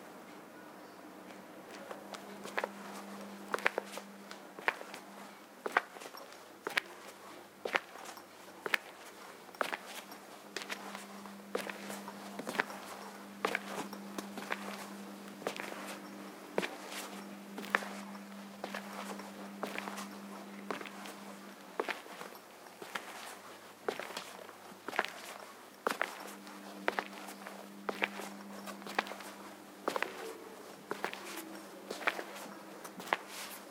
Recording of footsteps of a male walking on a tile floor wearing hard rubber slippers. Some background noise.
recording path: sanken cs2 - Zoomf8
slippers, inside, shoes, floor, footsteps, Steps, tiles, tile, walk, walking